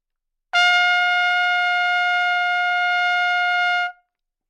overall quality of single note - trumpet - F5
Part of the Good-sounds dataset of monophonic instrumental sounds.
instrument::trumpet
note::F
octave::5
midi note::65
tuning reference::440
good-sounds-id::1025
dynamic_level::mf
neumann-U87, single-note, good-sounds, F5, multisample, trumpet